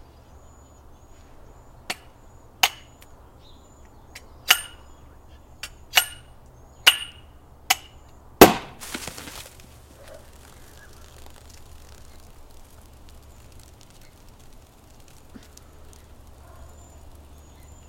This is the sound of attempting to uncork a bottle of champagne with a saber, but, after a few practice hacks that hit the glass neck of the bottle, accidentally cutting off the bottle at the neck. Champagne explodes out and drips onto the ground, which is grassy and dry. Recorded with a boom microphone onto a P2 card via a Panasonic HVX200 digital video camera.